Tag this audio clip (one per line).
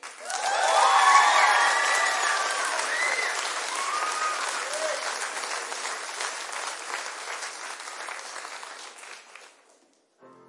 show
claps
theatre
cheer
applauding
concert
Clapping
Applause
Crowd
cheering
audience
People
auditorium